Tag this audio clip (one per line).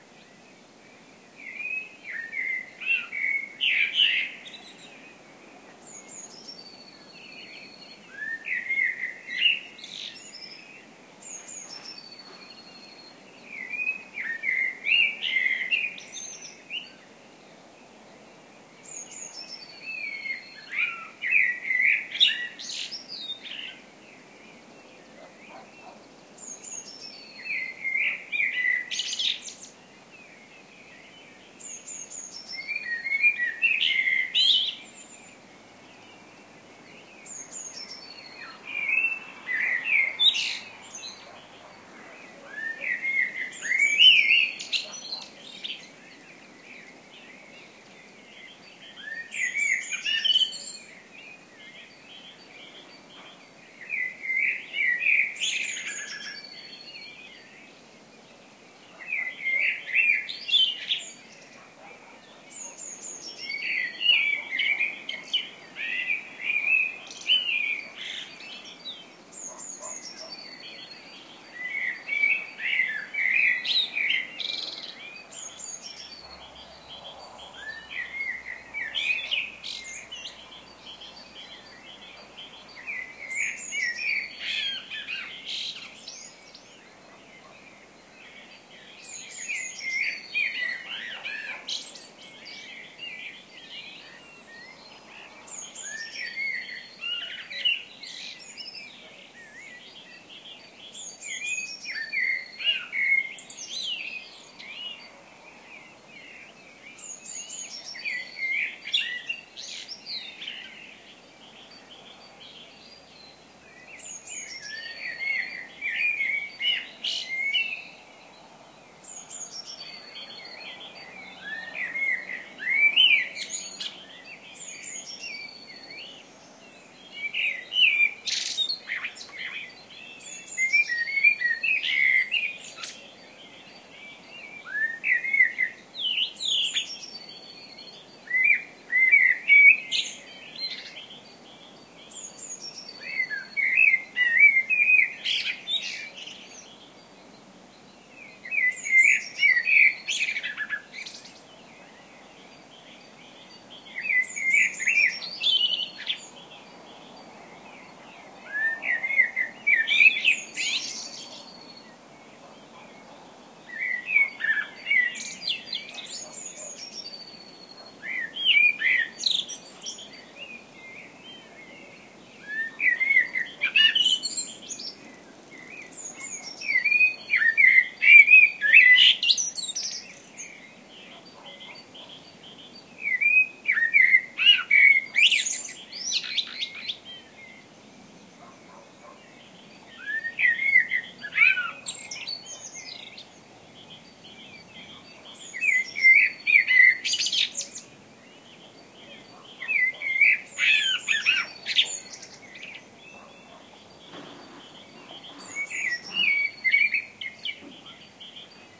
ambiance
field-recording
Spain
extremadura
cowbells
nature
spring
cattle